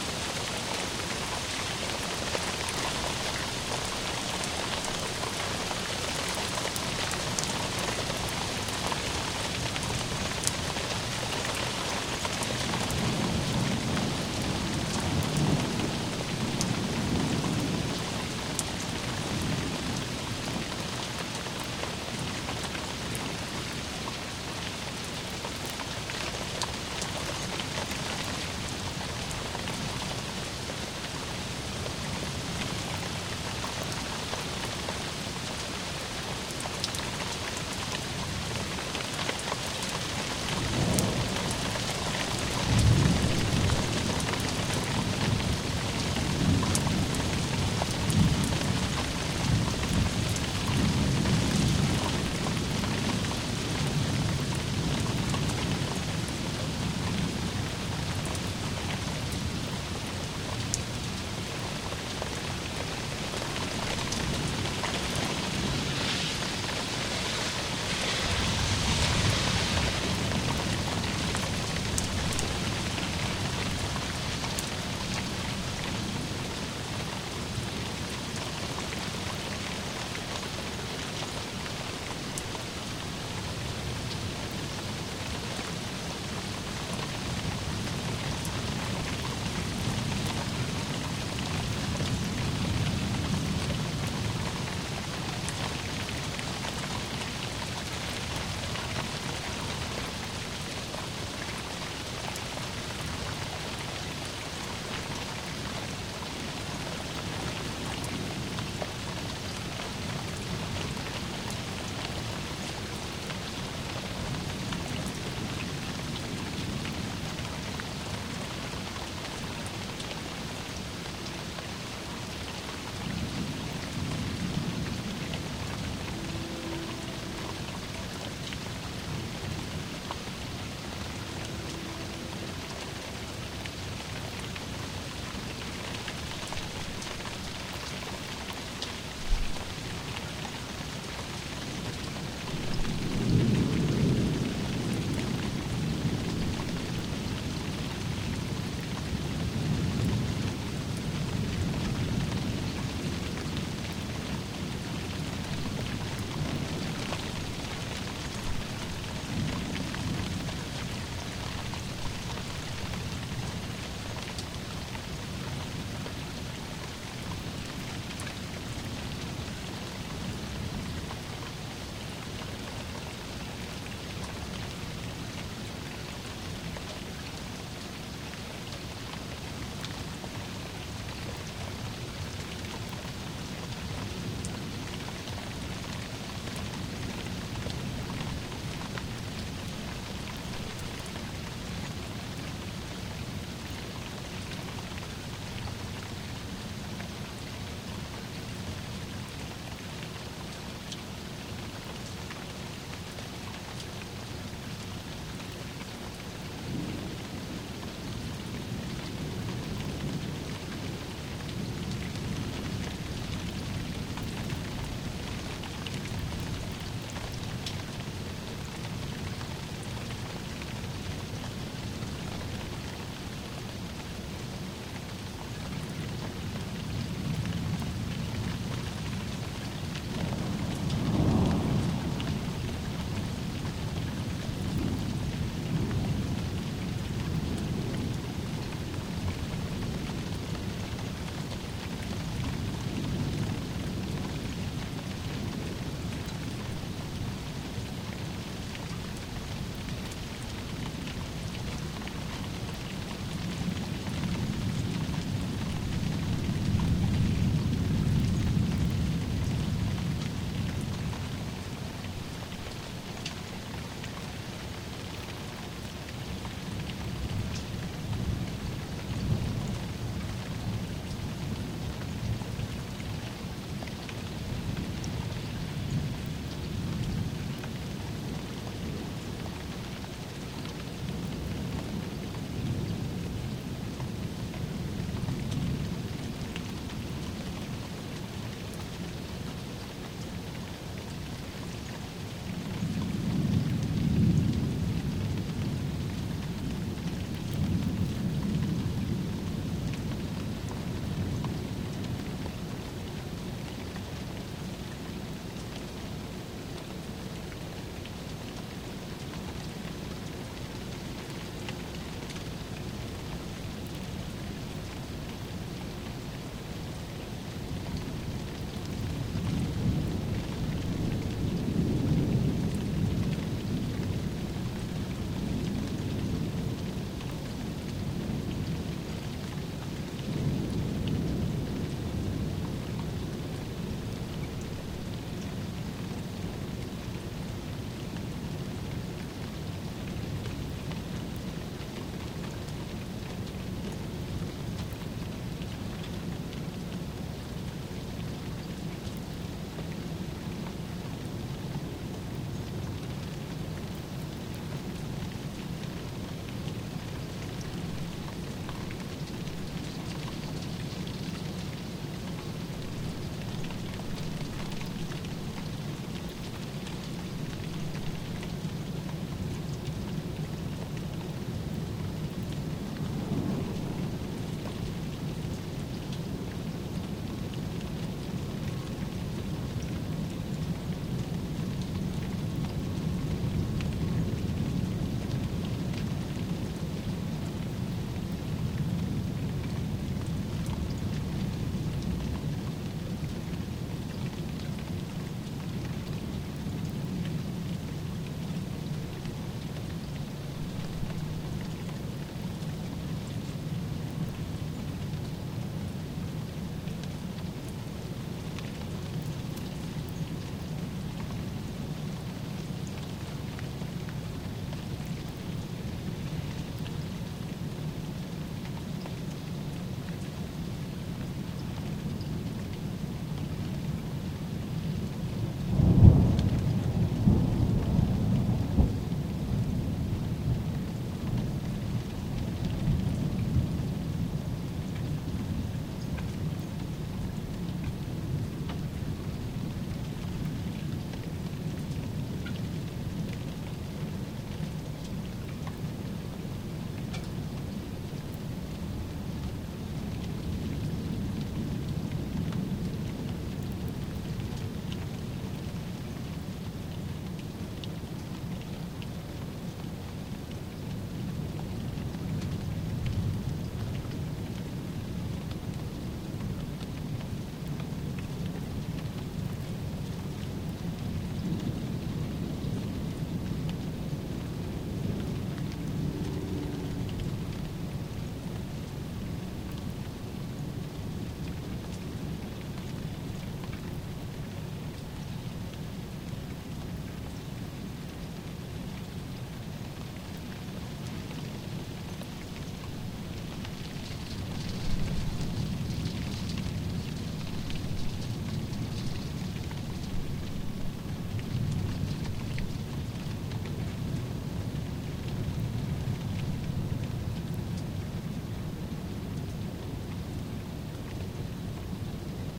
Thunderstorm aftermath. Sounds of a thunderstorm about 10 minutes after it left the area. So low rumbles and rain dripping. The sound was recorded using a YAMAHA AUDIOGRAM6 connected to a RadioShack brand Vocal Microphone.